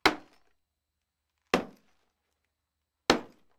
Hit table punch wood wooden
Hit, wooden, table, punch